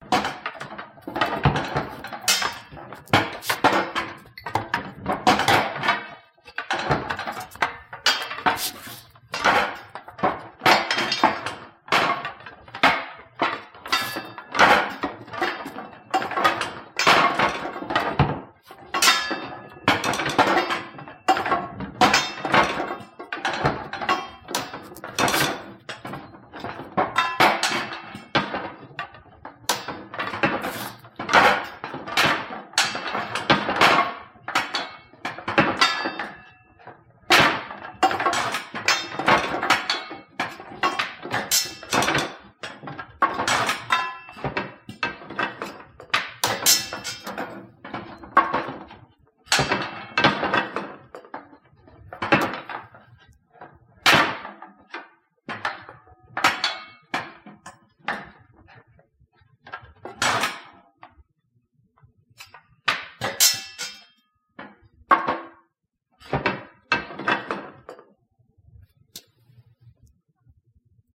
Weapons/Tools Taken off Rack
Sounds of weapons and tools layered to suggest several people gathering tools or weapons off a rack, or some kind of industrial activity.
hoe, Medieval, polearms, spear, Sword, Swords, tools